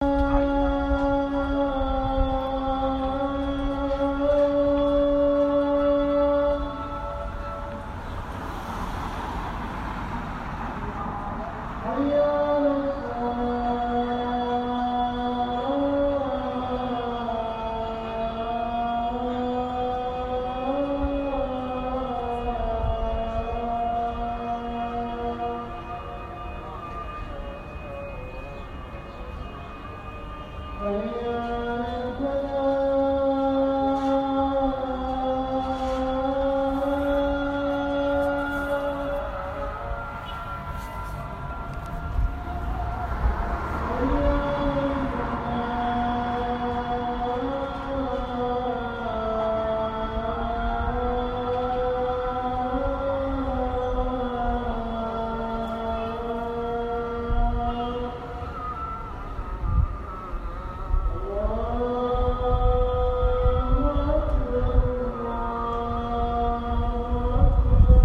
Muezzin or Muazzin - prayer call in Arabic - Jaffa, Israel
Call for prayer in the city of Jaffa, Israel. The muezzin or muazzin is played from loudspeakers in Muslim mosques around the city and can be heard during the day. Recorded with an iPhone.